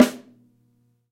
X-Act heavy metal drum kit. Tama Artwood Custom Snare Drum (14" x 5.5"). Recorded in studio with a Audio Technica AT3040 condenser microphone plugged into a Behringer Ultragain PRO preamp, and into a Roland VS-2400CD recorder. I recommend using Native Instruments Battery to launch the samples. Each of the Battery's cells can accept stacked multi-samples, and the kit can be played through an electronic drum kit through MIDI.
metal, heavy, kit, snare, artwood, drum, tama